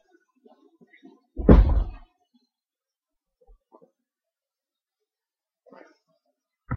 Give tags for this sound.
Hurt Body Fall Ouch Falling

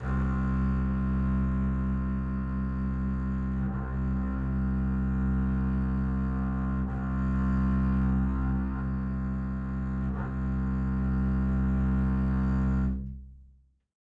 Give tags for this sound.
c2 single-note midi-velocity-95 vibrato-sustain strings solo-contrabass vsco-2

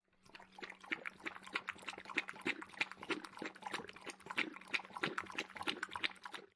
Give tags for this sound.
animal field-recording foley post-production sound-effect water-bowl

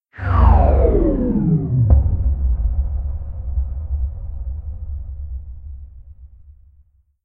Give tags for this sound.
bass off power power-down speakers suck sucking supernatural

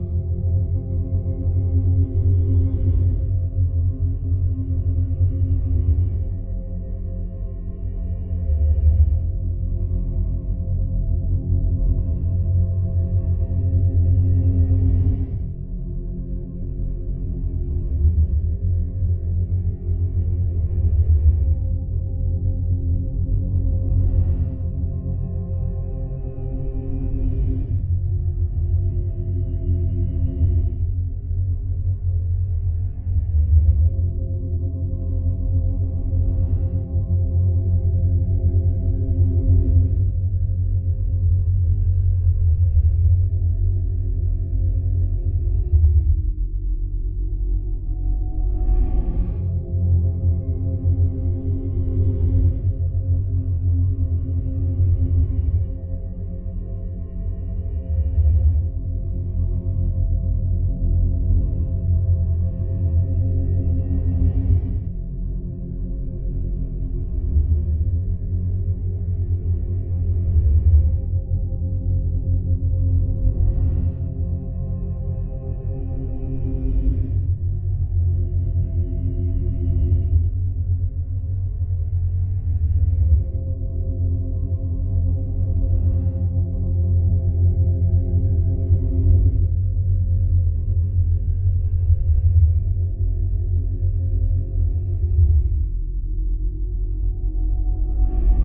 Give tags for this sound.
background-sound calm

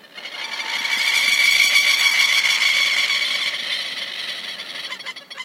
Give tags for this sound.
bird-attack birds group-of-birds

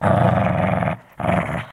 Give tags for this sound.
Animal Dog Growl Growling Grumble Grumbling Shih-Tzu Snarl Snarling

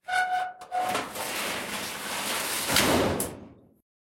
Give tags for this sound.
hammer metallic steel percussion impact iron hit ting bell shiny rod rumble factory metal lock industrial clang shield pipe nails industry blacksmith scrape

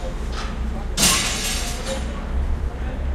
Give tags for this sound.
breaking environmental-sounds-research field-recording metal

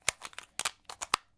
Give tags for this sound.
gameboy-advance reload magazine gun weapon hangun clip

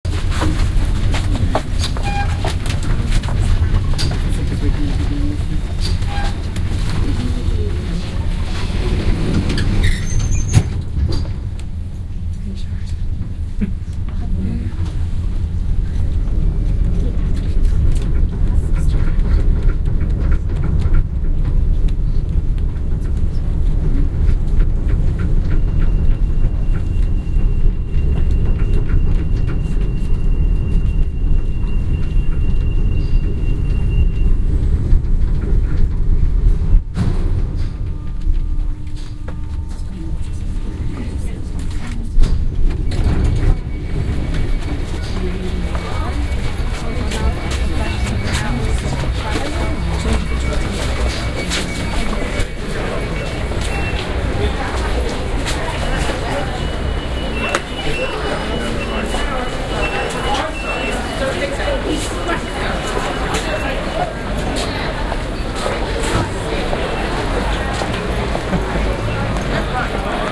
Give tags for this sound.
soundscape atmosphere field-recording ambient ambiance city london general-noise ambience background-sound

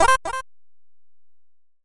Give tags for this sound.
Sounds effects FX sound-desing indiegame Gameaudio SFX

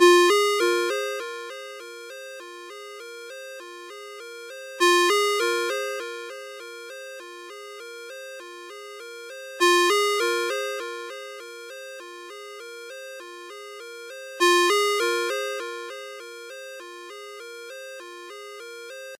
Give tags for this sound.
alarm alert alerts cell cell-phone cellphone mojo mojomills phone ring ring-tone ringtone up4

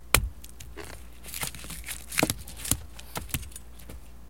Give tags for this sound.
crack knife blade sword gore crunch

